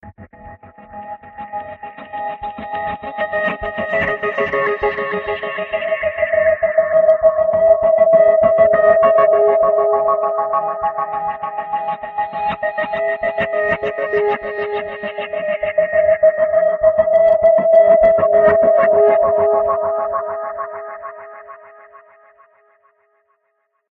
gated ambient synth moment